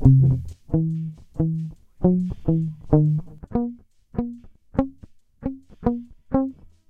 solo loops 7
nice solo guitar with a friend
solo, high, guitar